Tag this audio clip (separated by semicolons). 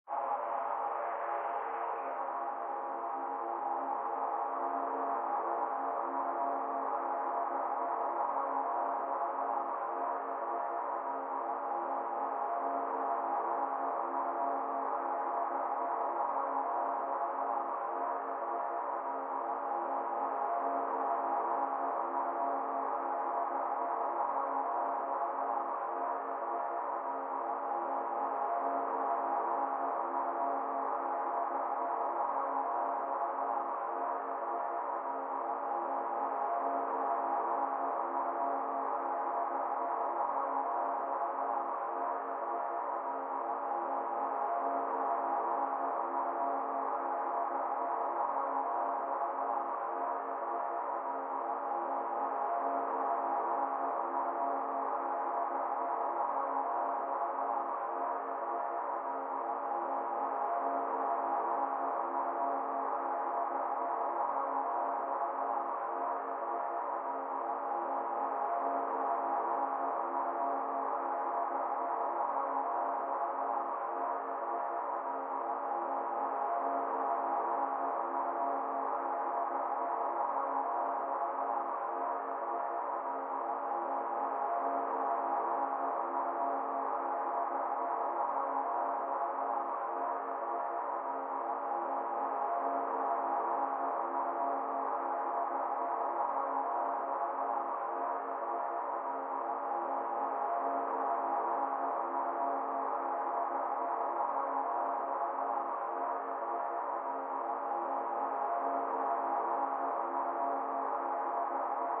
drone; angel